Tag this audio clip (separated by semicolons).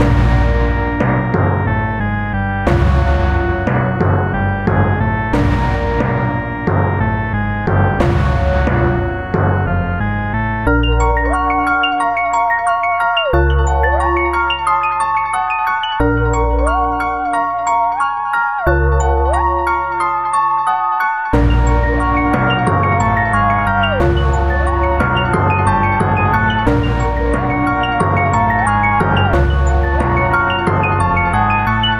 burial; cemetery; halloween; loop; music; organ; stupid; theremin; worrying